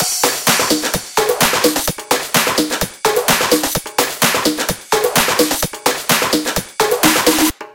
House Drum EDM Loop
A little loop I made using various synths.
Clap, Cymbal, Dance, Drum, Drums, EDM, Electro, HiHat, House, Loop, Minimal, Techno